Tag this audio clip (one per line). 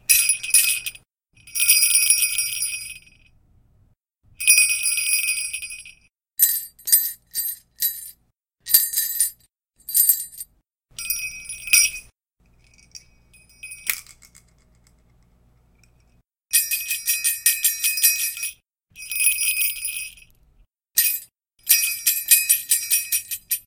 jingly
Christmas
sleigh-bells
sleigh
bell
bells